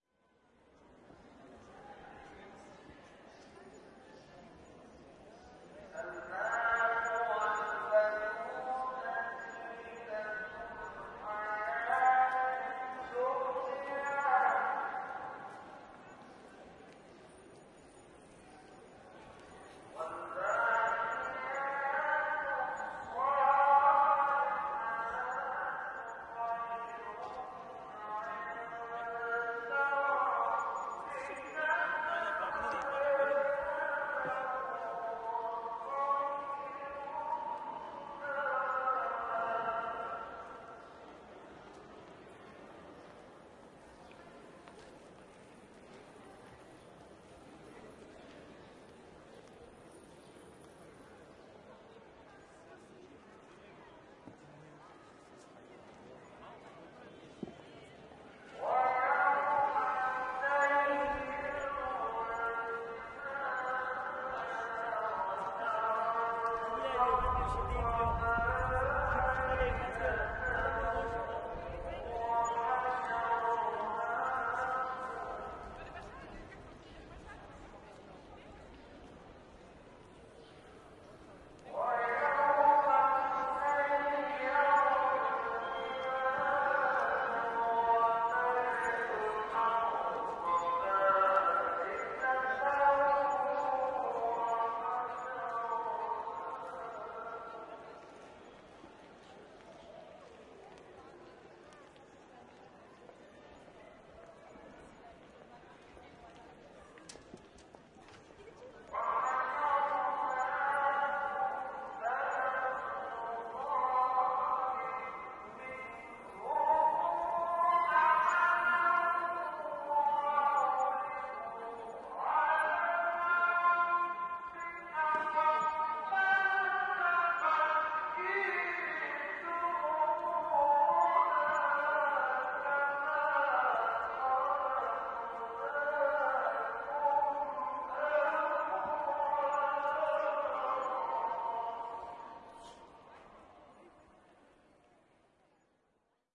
This sound was recorded outside the Blue Mosque in Istanbul during Friday prayer at the same time as the other recording from the Blue Mosque in may 2005.
Recorded by Asbjørn Blokkum Flø and Kjetil Bjørgan.
blue, friday, istanbul, mosque, prayer, wind